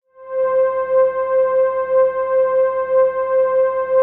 Soft Synth Strings
This is a synthesized strings sound, made by adding a low-pass filter to "Synth Lead 1".
string-wave, synthesizer-lead, cool-synth, synthesizer, electronic, keyboard, synth-strings, synth, strings, wave, string-lead, synth-lead, analog